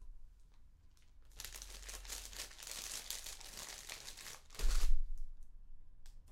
Opening a plastic wrapper, in this case from a soft biscuit. Self-recorded.